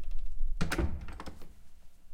Door Handle Close
Easily closing a wooden door. Recorded with Zoom H4.
close, door, doors, handle, moving, open, opening, wood, wooden